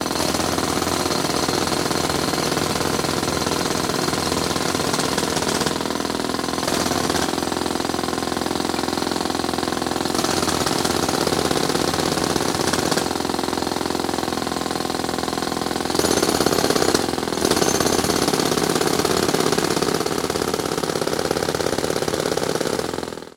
Weekend roadworks in downtown Rio de Janeiro recorded from 1st floor window with SM58
drill, field-recording, jackhammer, pneumatic, roadworks